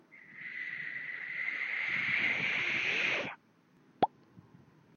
Funny Random Sound
Just a random sound I did with my mouth when I was bored.
Nothing much to say here except it's really hilarious (to me, I guess).
cartoon, funny, hilarious, human, mouth, pop, random, sound, voice